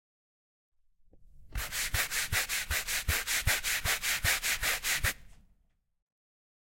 brushing carpet
cleaning, house, housework